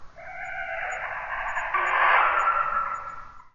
Dinosaur 2 - Khủng Long 2
AUDACITY
Stereo channel:
- Select 0.000s to 0.302s
- Effect→Noise Reduction
Get Noise Profile
- Select all
- Effect→Noise Reduction
Noise reduction (dB): 12
Sensitivity: 6
Frequency smoothing (bands): 3
- Cut section 3.582s to 4.078s
- Effect→Normailize...
✓Remove DC offset
✓Normailze maximum amplitude to: –3.0
✓Normalize stereo channels independently
- Effect→Change Speed...
Speed Multiplier: 0.15
- Select 0.000s to 0.300s
- Effect→Fade In
- Select 3.262s to 3.560s
- Effect→Fade Out
animal, ng-long, dinosaur, growl, khu